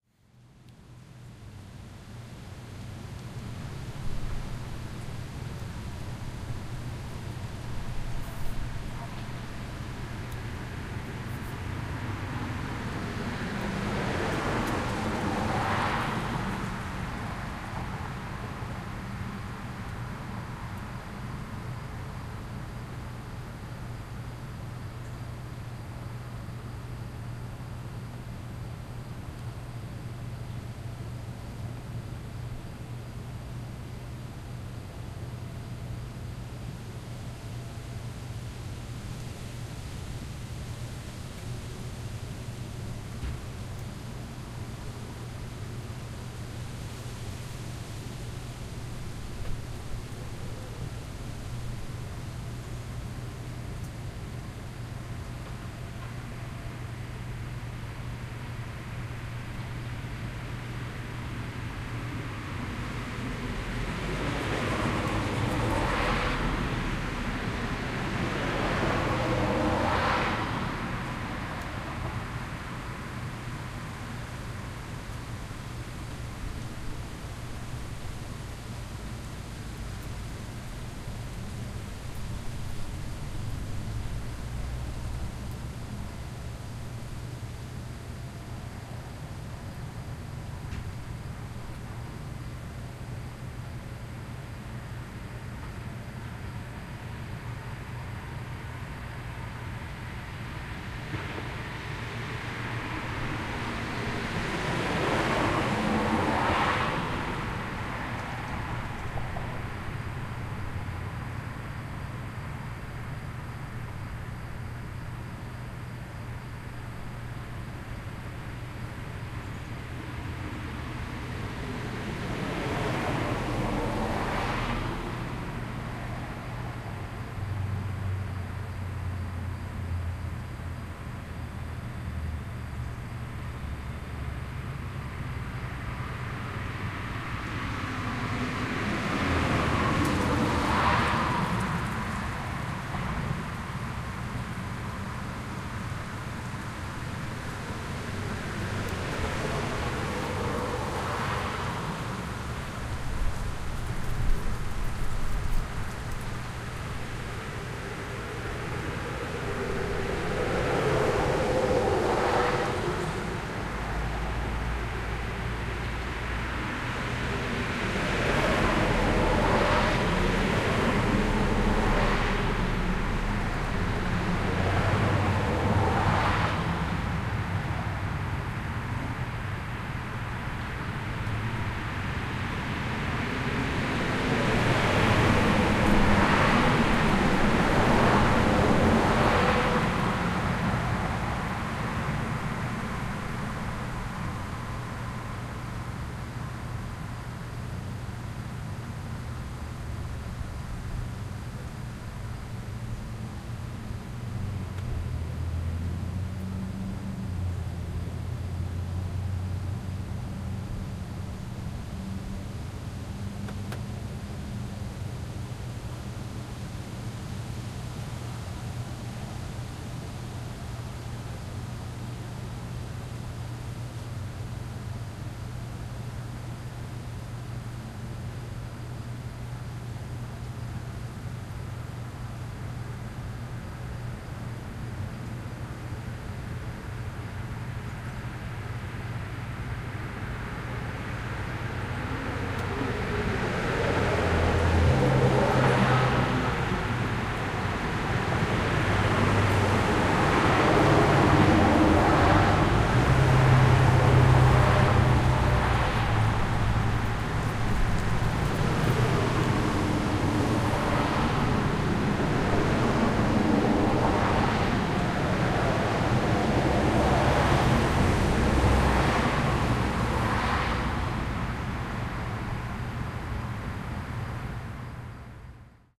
Sketchy Neighborhood Traffic Day
Daytime traffic passes in a sketchy neighborhood, early fall. Ambience.
ambience, day, fall, field-recording, leaves